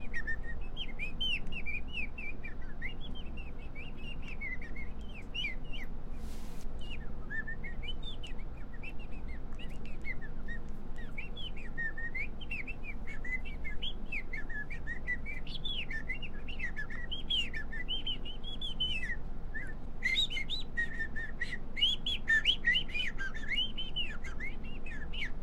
Birds Nature Forest
Birds, Forest, Nature